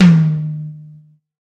DW drum kit, used: Sennheiser e604 Drum Microphone, WaveLab, FL, Yamaha THR10, lenovo laptop
drum, DW, hi, kit, tom